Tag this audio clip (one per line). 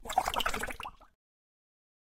wizard
potion
magic